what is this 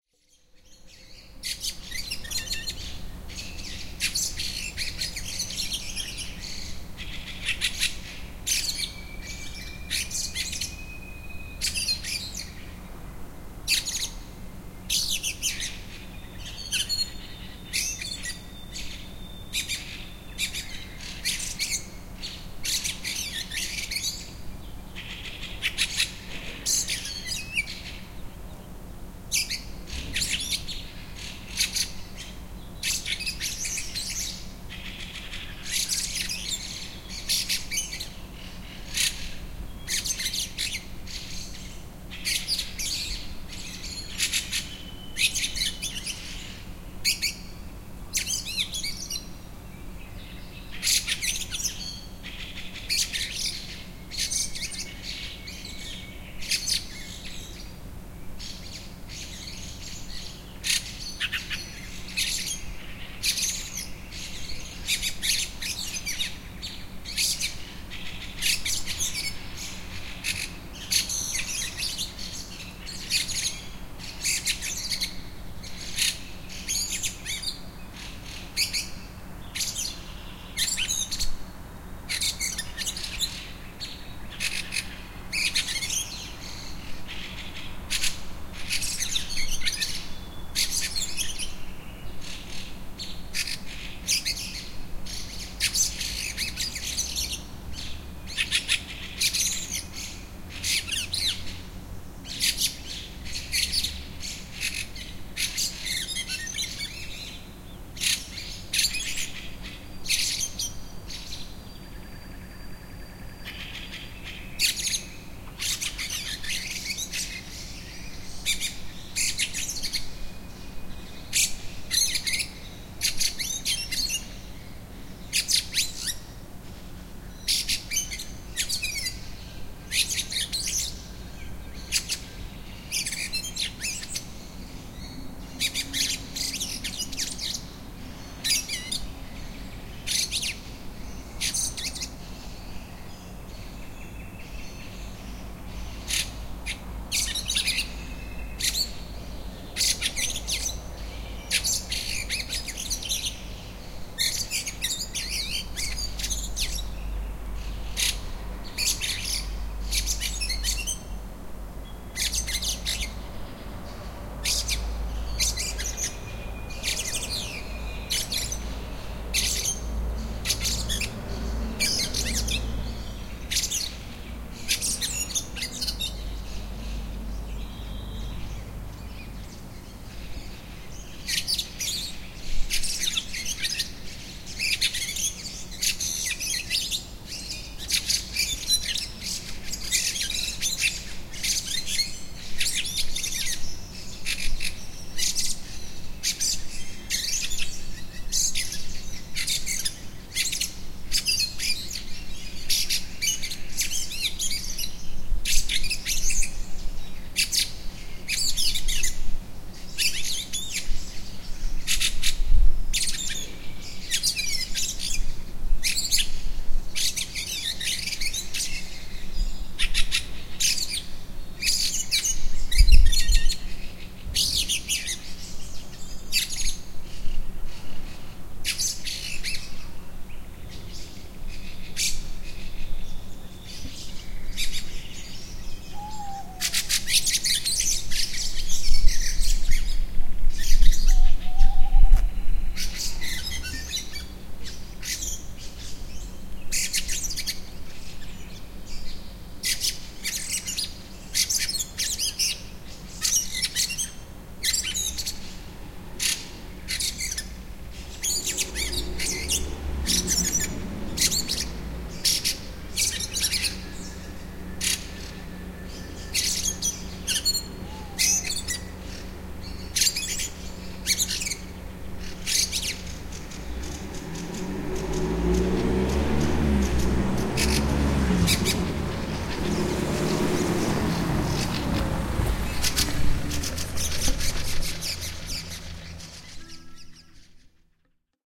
birds from poland citi in the morning - szczecin